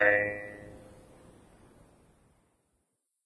jews harp 06
A pluck on a Jew's harp.
Recorded late at night in my bedroom on a Samsung mp3 player.
Unfortunately the recording have a lot less warmth to it than the instrument has in reality.
6 of 15
guimbarde, mouth-harp, jaw-harp, drone, jews-harp, ozark-harp, trump